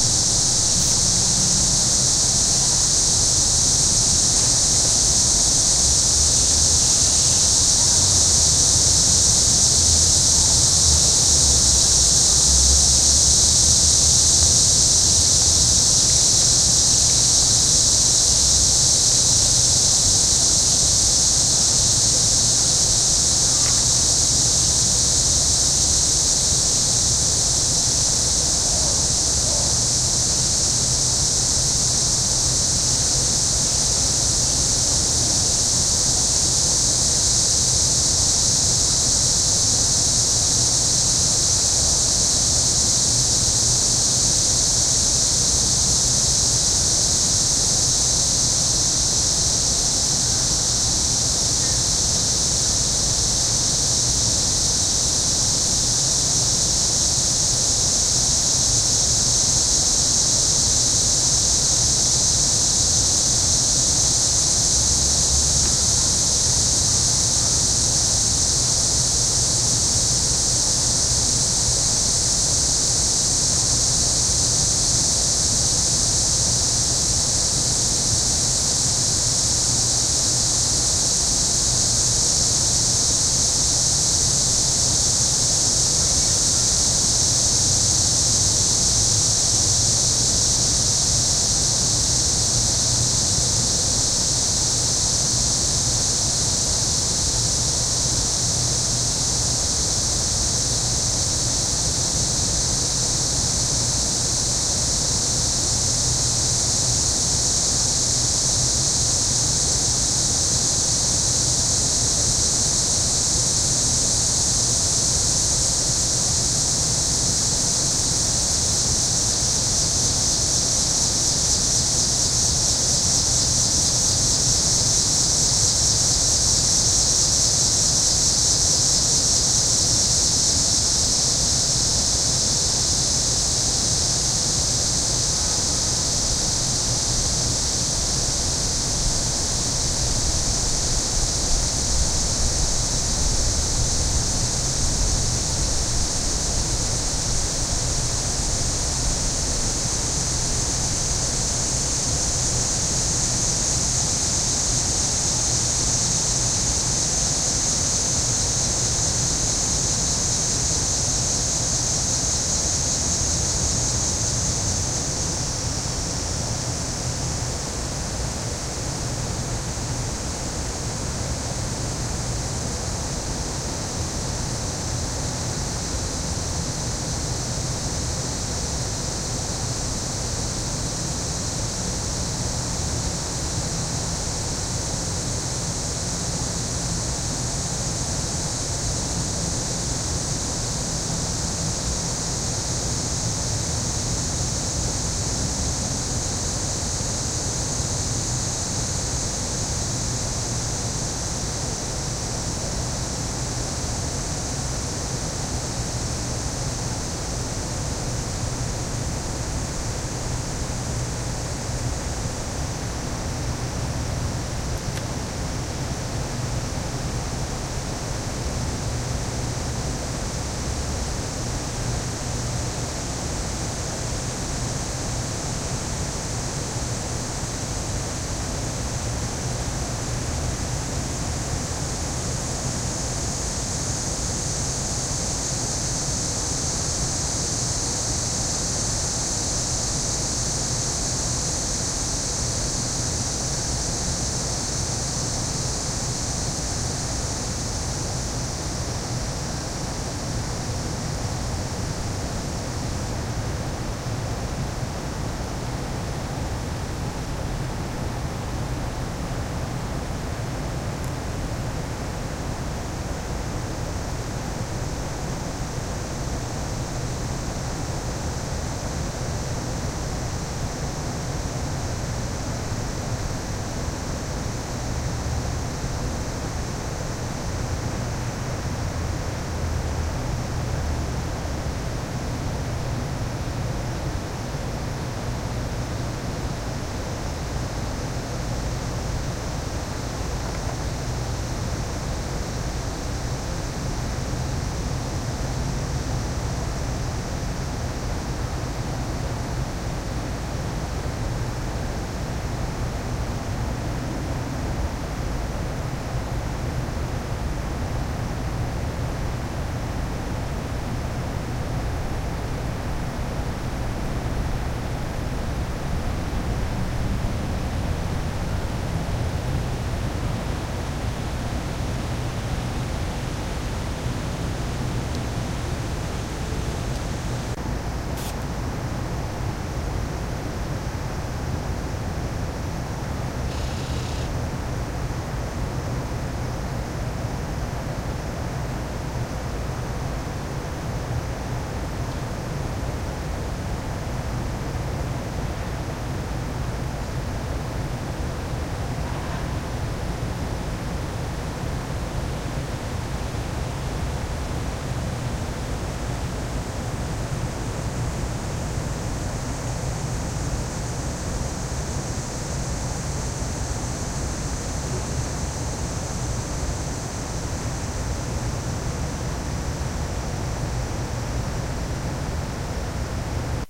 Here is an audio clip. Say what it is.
An evening on the IU campus. The ever present A/C's are running behind the sound of insects in the trees. Recorded with my Sony MZ-N707 MD and Sony ECM-MS907 Mic.